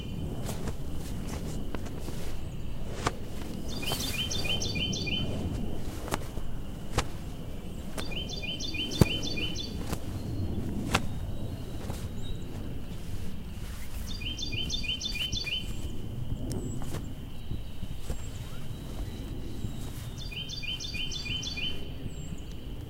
Flag Flapping and Birds 1
Birds and nature ambience throughout recording.